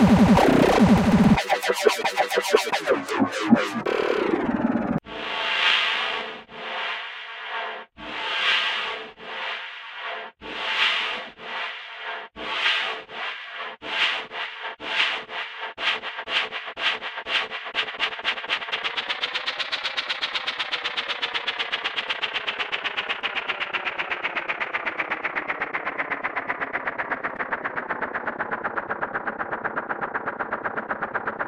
My First Attempt at Creating A Dubstep Sound. A Total mess of a sound. Download if you dare.
DarkArx